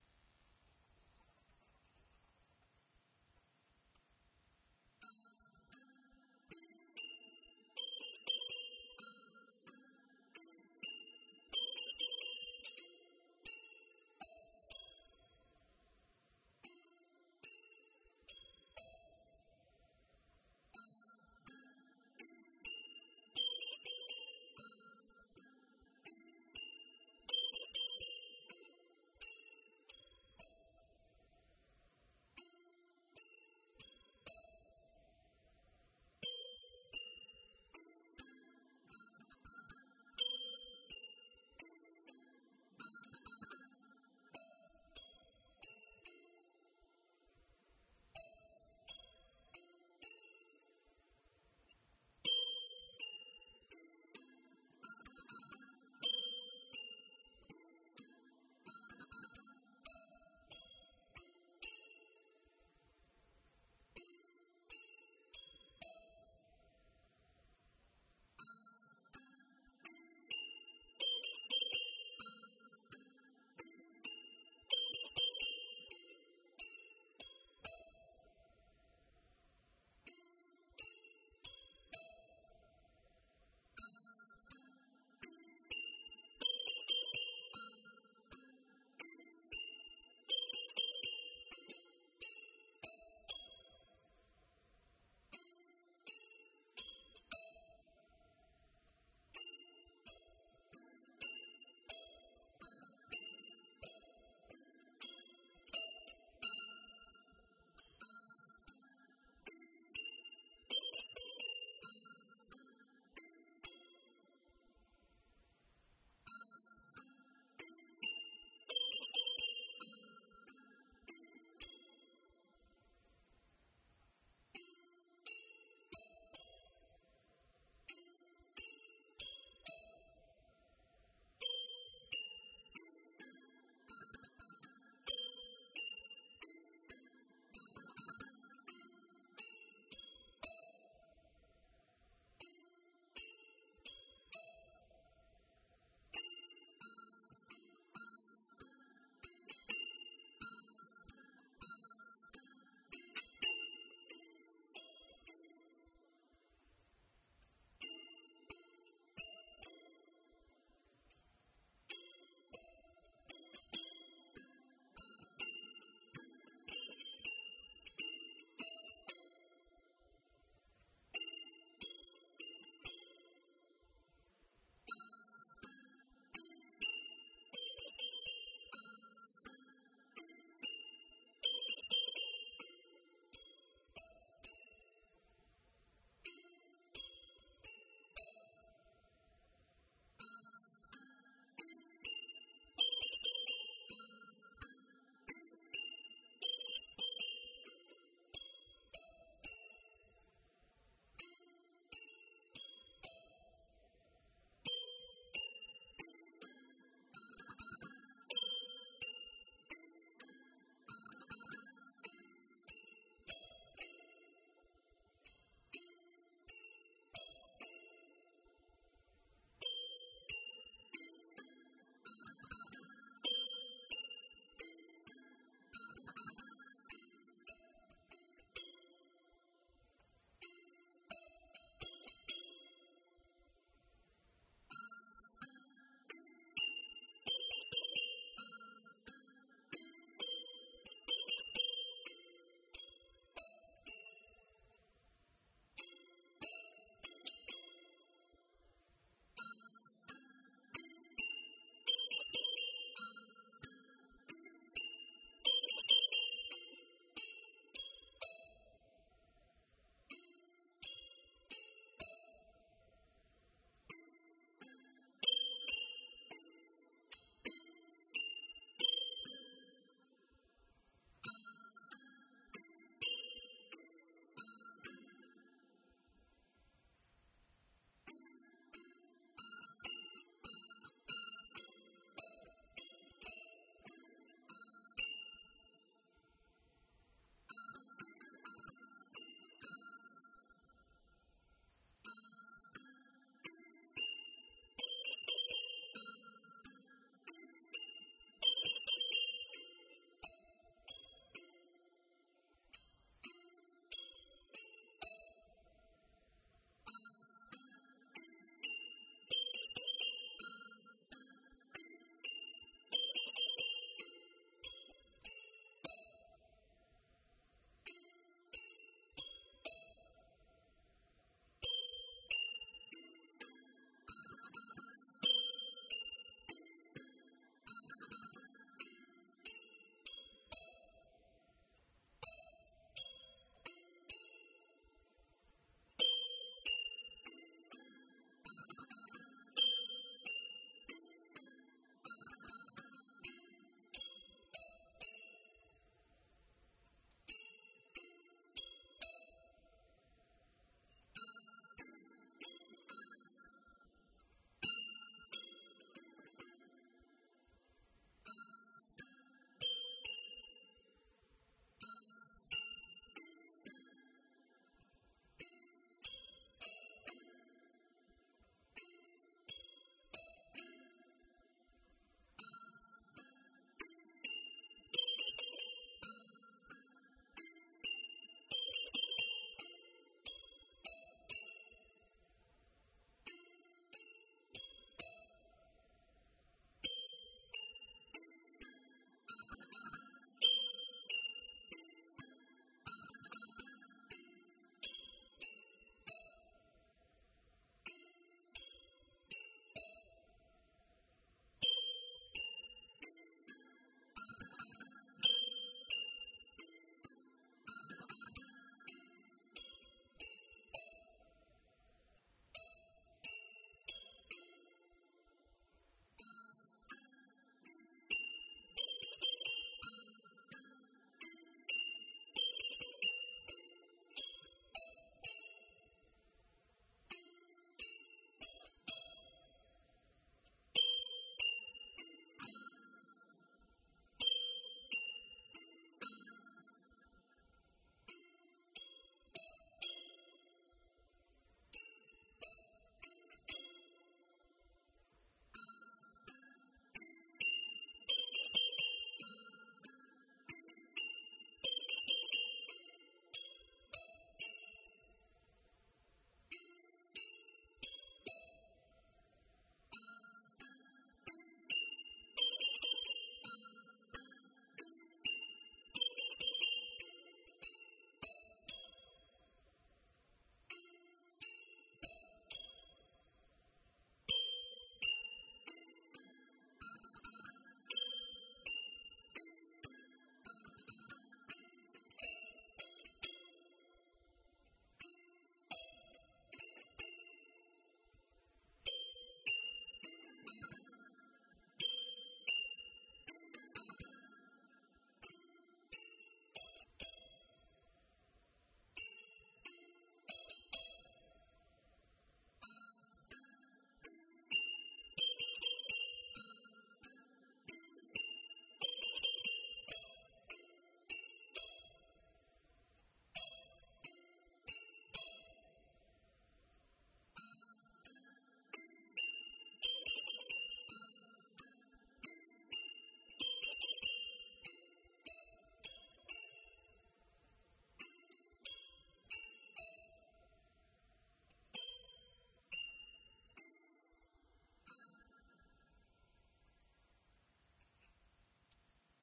Recording of a Hokema Kalimba b9. Recorded with a transducer attached to the instrument and used as microphone input with zoom h2n. Raw file, no editing.
filler, instrumental, kalimba, loops, melodic, thumbpiano